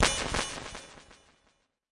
A percussive synth sound with delay.
This is part of a multisampled pack.
synth, one-shot, delay, multisample